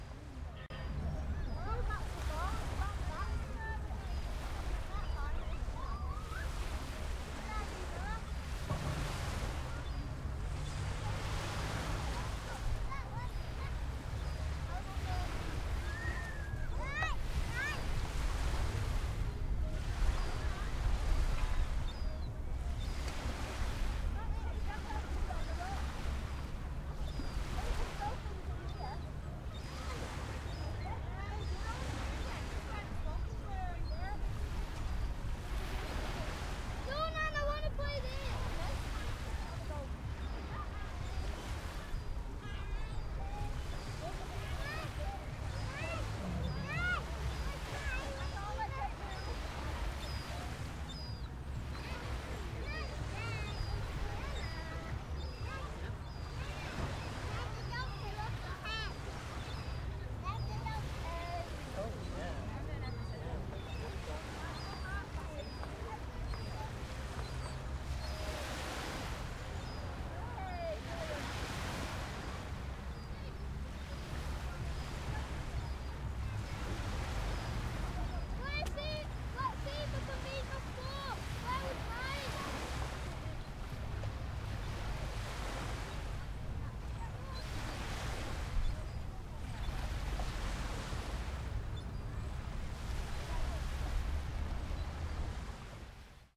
Morcambe Sea Front2
One of many shore samples of the seafront in morecambe that I recorded for an up comming project in the Winter Gardens.
This sample contains a more ambient feel of the seafront with children talking and seagulls in the background
I have the MS recording of this too, if you would like this please send me a message.